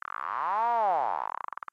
Ow Squelch 1
A Short psy squelch made with TAL-Noisemaker and TAL Filter 2, free vst's.
digital, effect, psychedelic, psytrance, sci-fi, sfx, sound-design